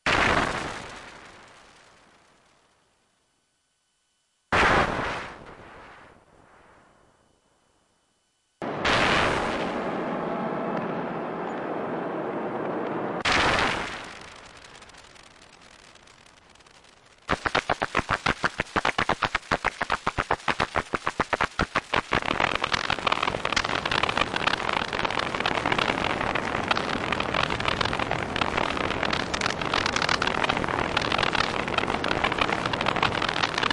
A reel made with the Shared System and Moog Mother 32, recorded with the Morphagene. It contains 4 sounds with splice markers. Enjoy!
Space, MG, Morphagene, Make, Reel, Machines, Mechanical, Noise